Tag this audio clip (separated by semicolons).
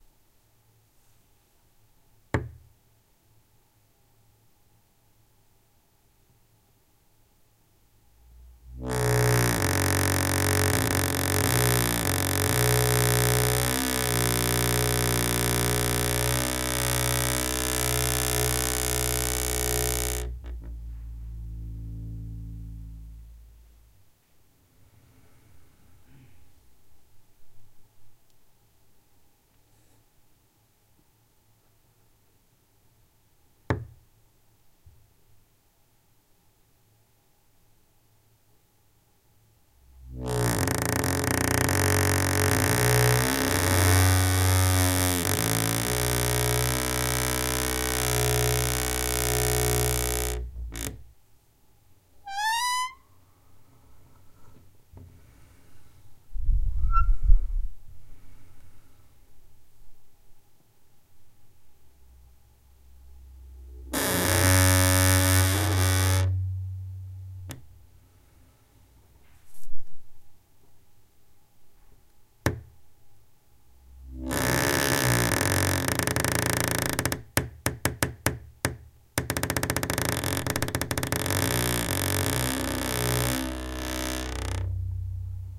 bass; creak; creaky; door; hinge; squeak; squeaky; wood; wooden